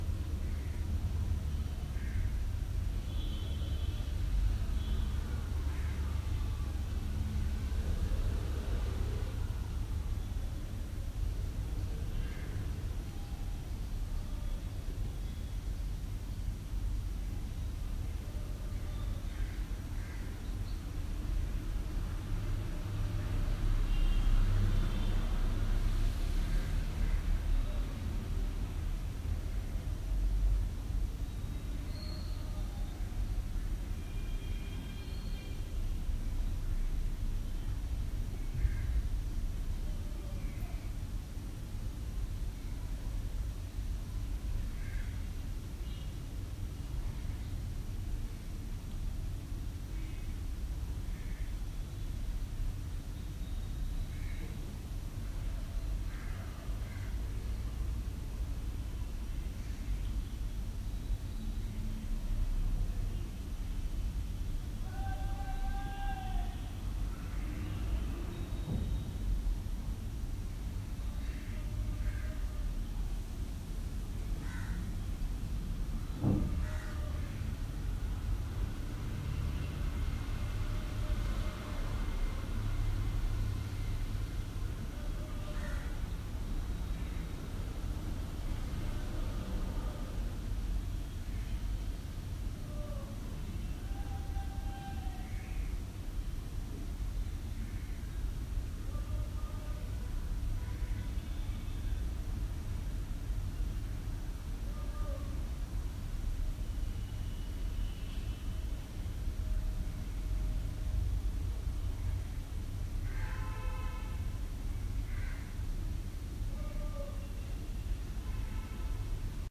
Interior Residential Area Ambiance & Room Tone Bangalore India
Exterior room tone and ambiance captured at 7:30 am in an empty apartment in a residential neighbourhood in Bangalore, India. Some birds, light passing vehicles.